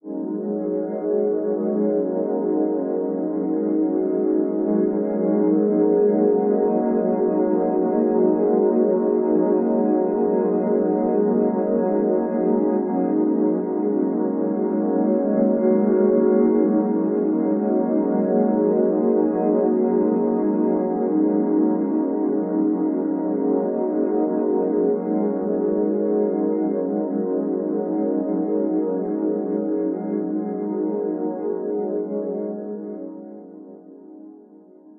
hg s-piano penta down 1 smooth pad
pad, drone, evolving, warm, smooth, ambient, pentatonic